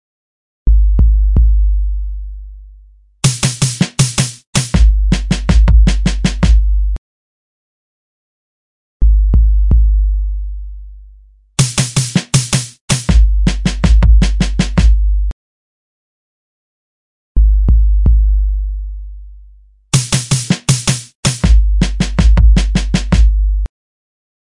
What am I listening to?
Military Bass
808-kick, Hip-Hop